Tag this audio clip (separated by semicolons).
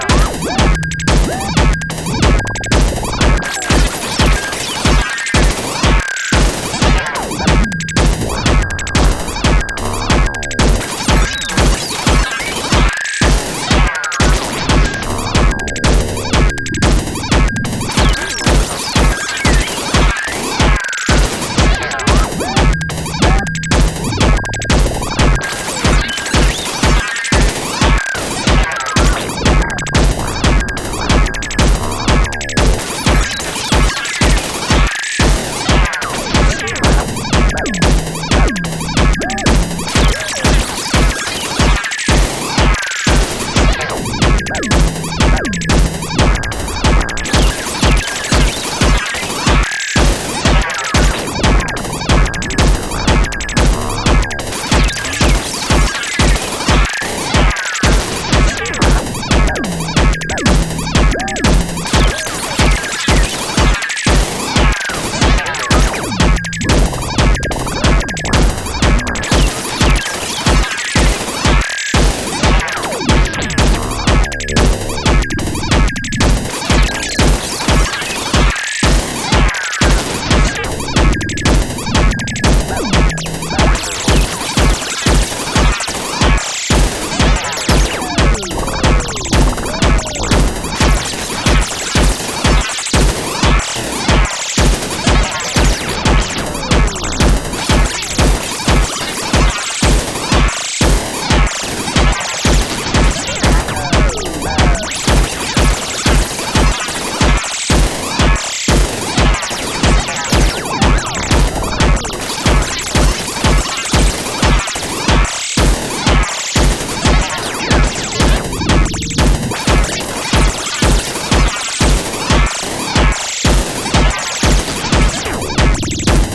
beat; digital; drum; electronic; loop; modular; synth; synthesizer; techno